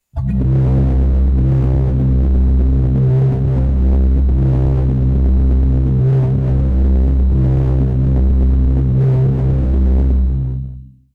weird synth sound effect for intro or video game
ambient, bass, fun, intro, loop, low, new-age, synthesized, weird